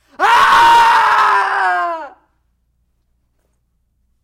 male scream
screaming, guy, boy, male